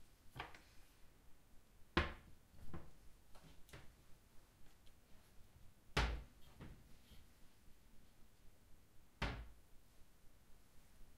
Dresser Door 02
dresser door opening and closing.
close, door, dresser, furniture, open, sideboard, trap